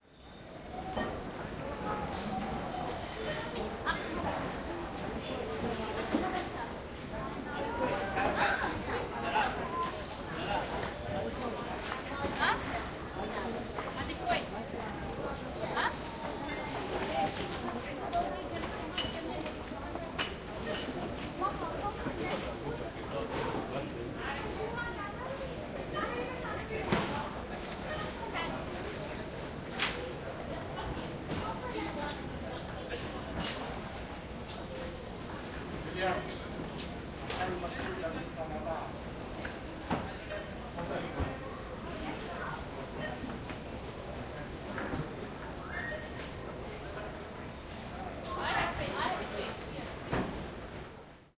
AMB DXB Airport DutyFree
Dubai Airport Duty Free.
Airport, Ambience, Crowd, Dubai, Duty-Free, UAE